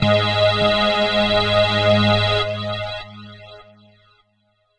Two hyper saw oscillators with some high pass & low pass filtering, heavy analog settings, some delay, chorus and comb filtering. The result is a very useful lead sound. All done on my Virus TI. Sequencing done within Cubase 5, audio editing within Wavelab 6.